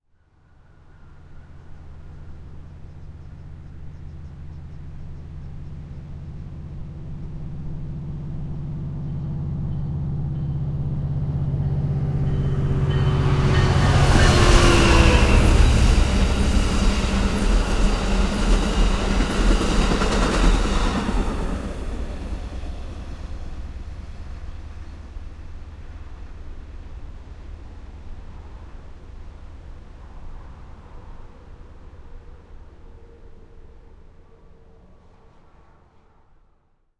A train passing on a stretch of highway that is located right on the Pacific Ocean.